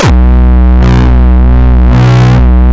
gabba long 003
gabba, kick